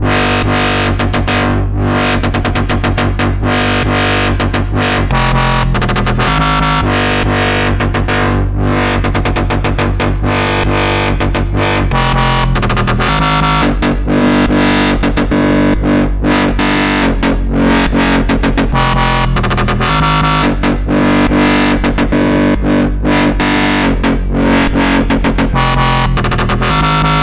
Dubstep Bassline Datsik Style
Typical, midrange dubstep bassline ...
midrange, datsik